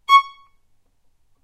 violin spiccato C#5
spiccato, violin